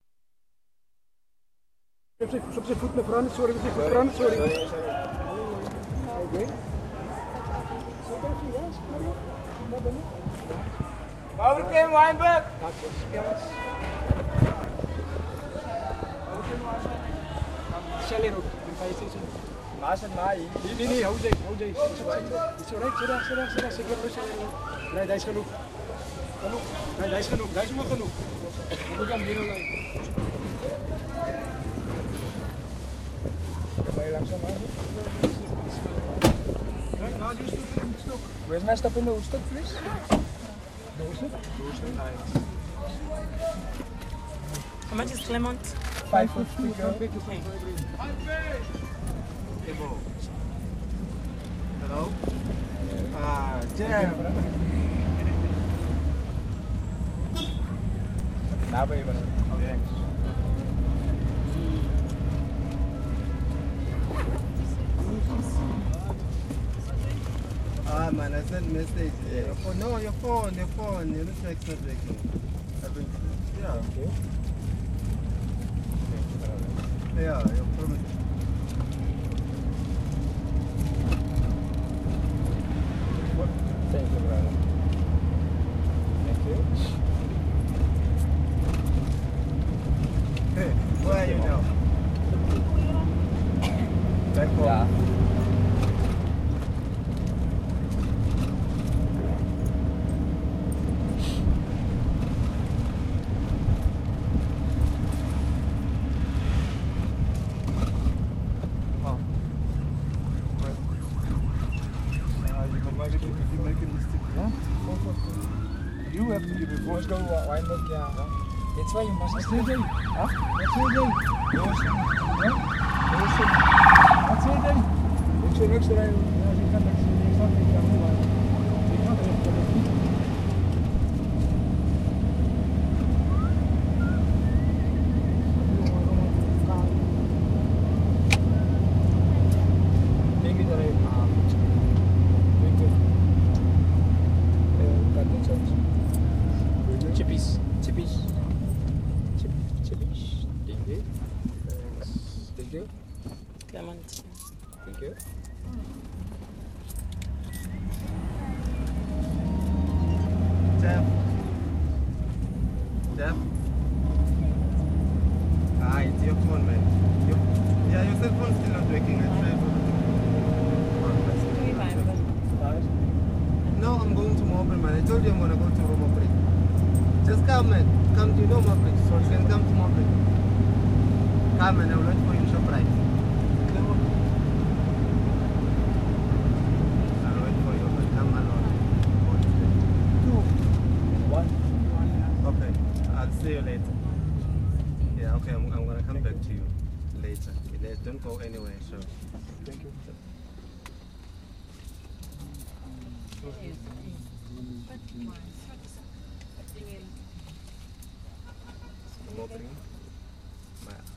combi taxi from Cape Town central taxi rank to Observatory (part 1: gaadjie, yimalini? setting off)
street, taxi, south-africa, urban, field-recording, cape-town